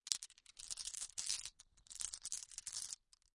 Glass marbles being shuffled around in cupped hands. Dry, brittle, snappy, glassy sound. Close miked with Rode NT-5s in X-Y configuration. Trimmed, DC removed, and normalized to -6 dB.